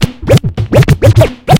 Recorded in cAVe studio Plzen 2007.
you can support me by sending me some money:
break, dj, drumloop, funky, loopable, record, scratch, scratching, turntablism, vinyl